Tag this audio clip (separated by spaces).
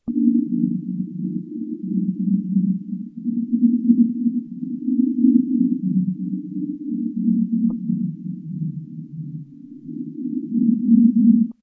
ambient flanger spacial stereo additive-synthesis